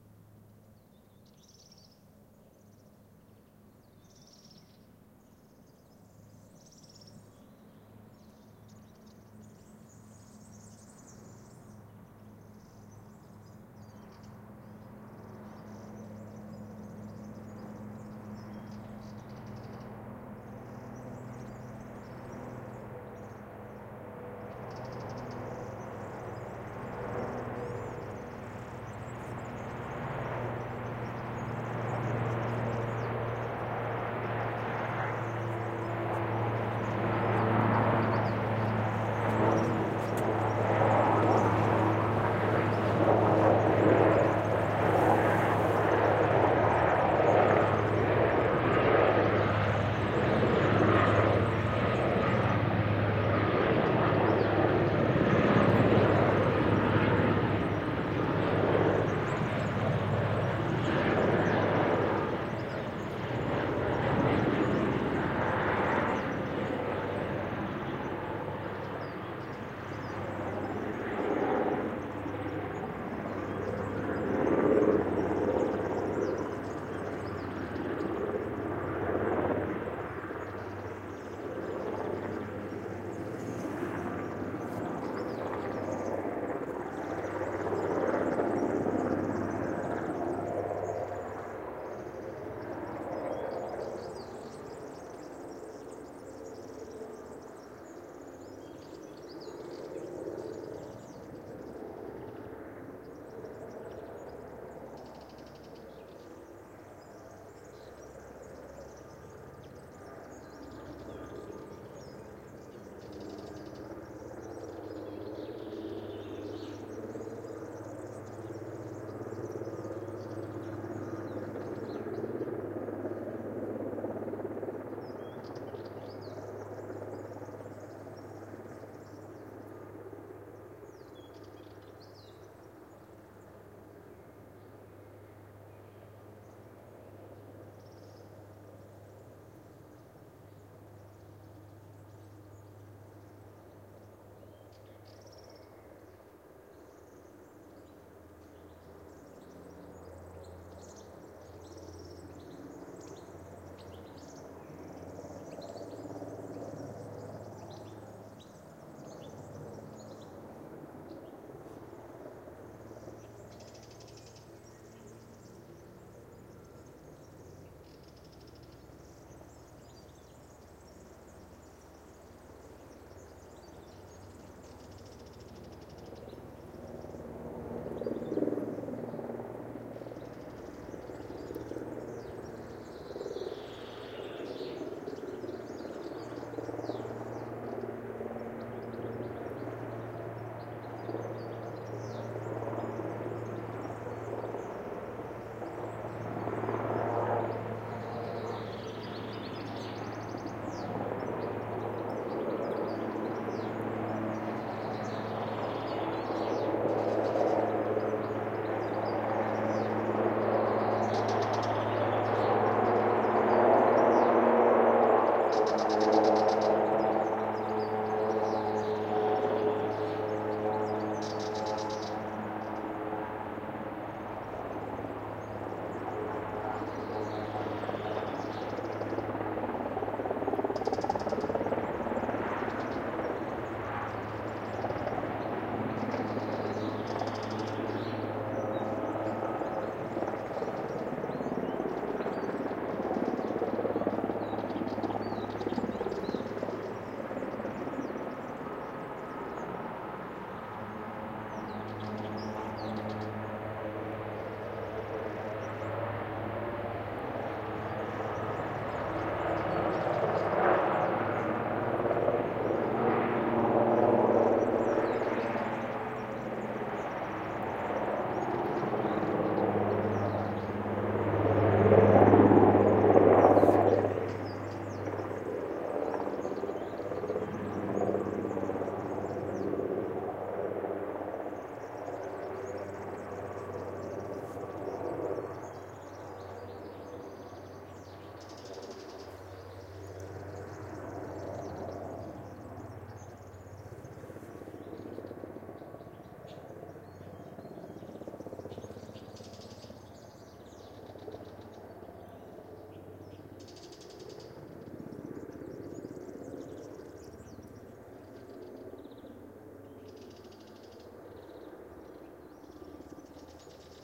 20160610 passing.copter.forest
Helicopter makes two passes, forest ambiance in background. Sennheiser MKH 60 + MKH 30 into Tascam DR-60D MkII recorder. Decoded to mid-side stereo with free Voxengo VST plugin